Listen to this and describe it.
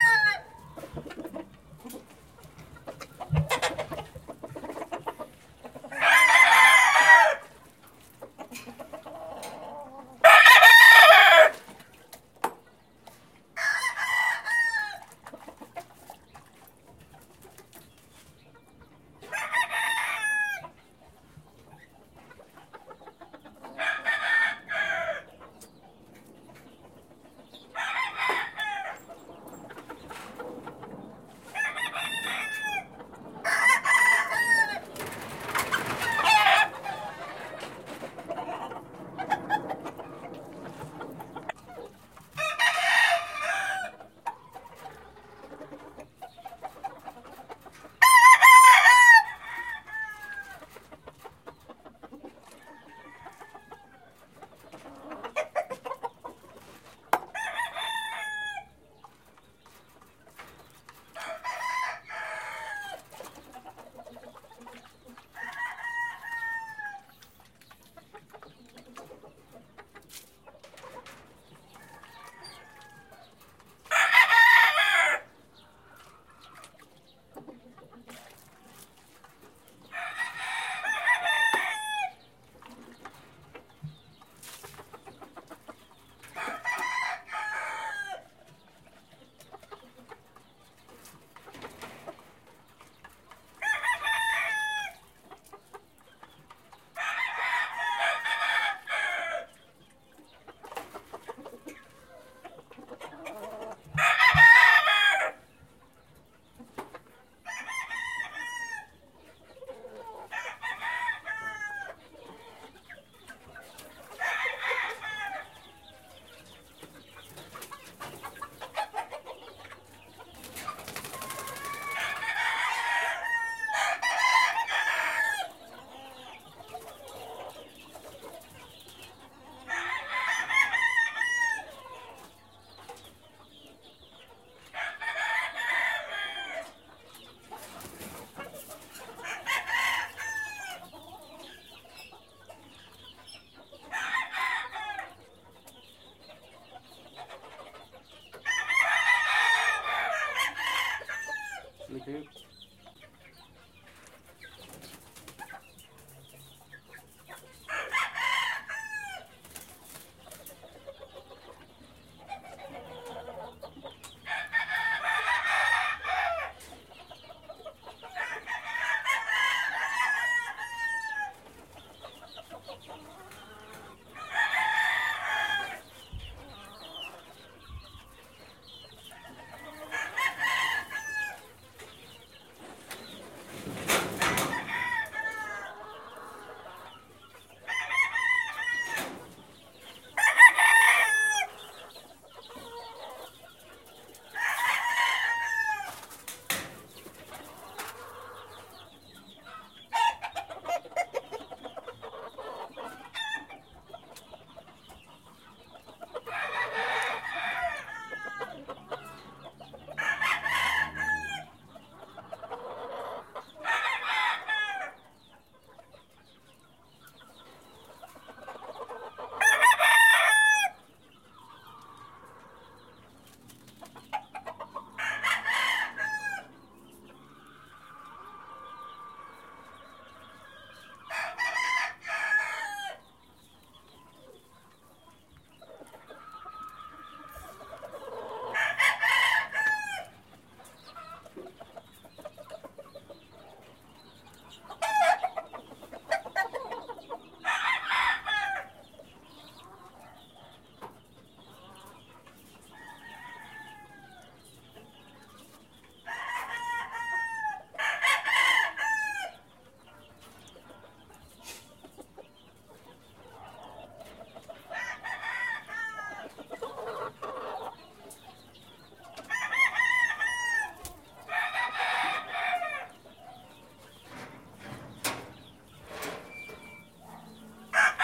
grnaja de gallos. grange of roosters.
granja, gallos, hen, roosters, gallina, grange, chinkens